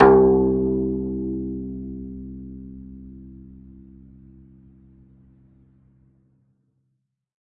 single string plucked medium-loud with finger, allowed to decay. this is string 1 of 23, pitch C2 (65 Hz).
guzheng, kayageum, kayagum, koto, pluck